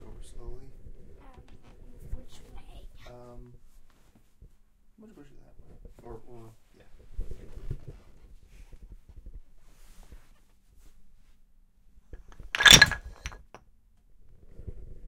FX Blocks Topple 03
With an assist from my daughter, a toppling tower of wooden blocks.
fall, wooden, wood, topple, blocks